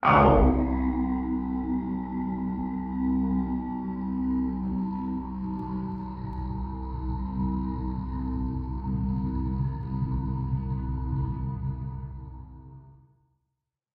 An om element for ambient meditative mixes, etc
monks, chant, ohm, om, meditative, spiritual, meditation, throat